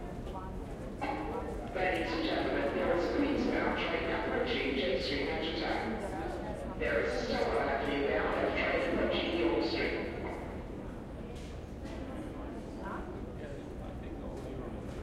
Subway Operator Amb Exterior 02
Subway station announcement, underground station
NYC, H4n, Zoom, field-recording, MTA, subway